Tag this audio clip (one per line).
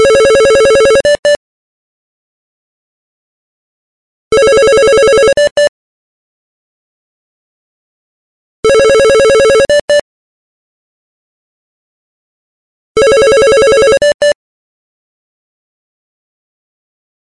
Tone
Hold
Ringtone
Doctor
Physician
Ringing
Phone
Ring
s-Office
Telephone